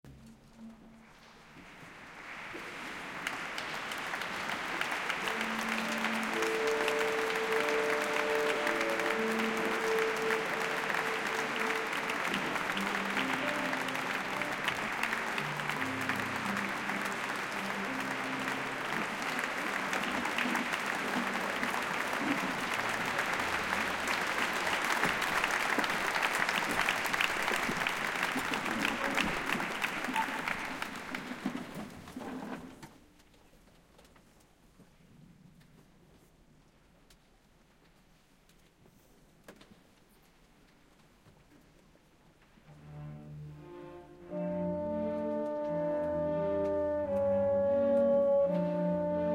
Last chord and applause at the end of a classical concert in St. Stephan's Cathedral (Stephansdom) in Vienna, Austria, spring 2012. Audio taken from video camera.